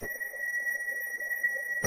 high pitched sound
can be used for someone concentrating or someone seeing something out of the corner of their eye
know, anything